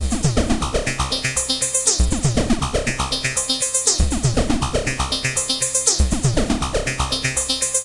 120bpm bleepy loop. Made on a Waldorf Q rack.
120bpm, bleepy, loop, synthesizer, waldorf